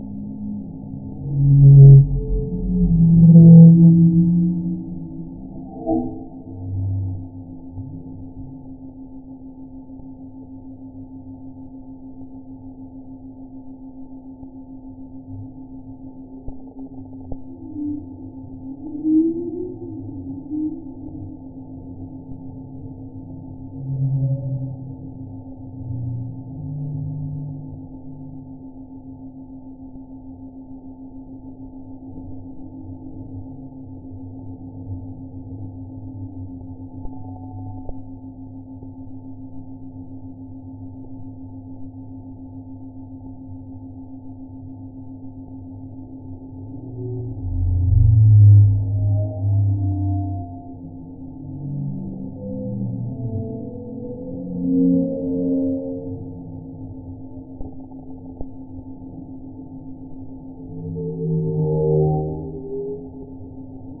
alien modulated voices and musical modulated voices in technical atmosphere
alien modulated voices and/or voice-music alien language in technical sounds environment
electronic algorithmic sonic objects
exomusicology, alien-voices, alien, exomusic